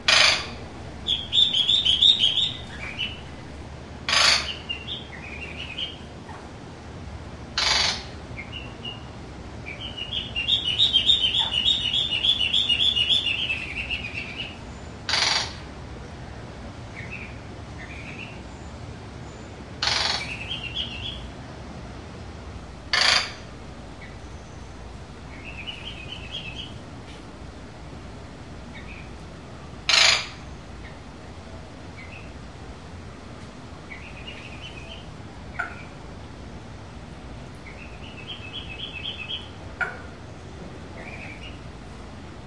Strange rattling sounds made by an Eclectus Parrot. Also heard is the song of a Collared Finchbill. Recorded with a Zoom H2.